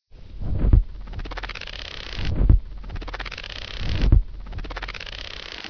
creepy space frog
science-fiction fantasy film designed
fantasy, designed